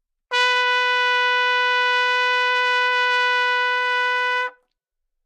Part of the Good-sounds dataset of monophonic instrumental sounds.
instrument::trumpet
note::B
octave::4
midi note::59
tuning reference::440
good-sounds-id::1031
dynamic_level::mf